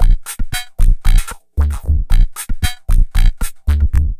a maestro drum machine rhythm filtered through a digitech talker.
loop, drumloop, processed, filtered, vocoded